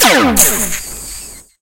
This shall be rhe sound of a StarWars Laser. I created it for emipre uncut, a project, where people can claim some scenes of a star wars movie and reenact it.
The sound is a combination of a SymSynth Laser Sound and the sound a red glowing wire makes, when you put it into water. Some sound effects I used, also (Audacity with free plugins).
Sound-Effect, Empire-uncut, Symsynth, space, Laser